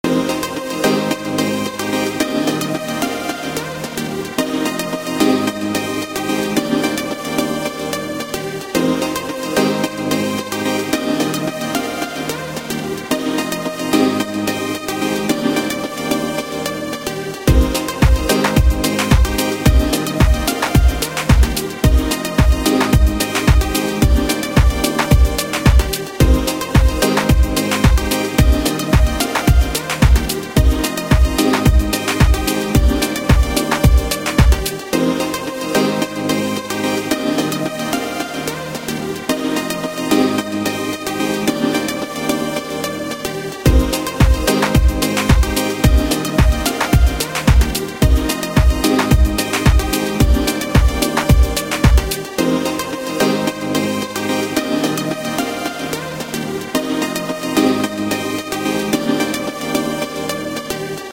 Cool Chill Beat Loop
A short chill loop I made in garageband on my ipod. thought it turned out pretty well.